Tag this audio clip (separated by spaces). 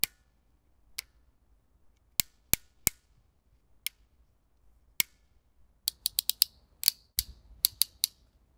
bicycle bike change changing click clicking gear manual mechanical mechanism mountain-bike speed switch tick ticking